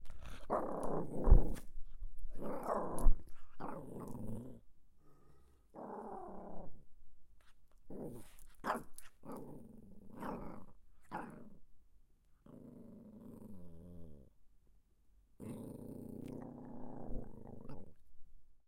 Sky Growls
My small Chihuahua mix dog barking and growling.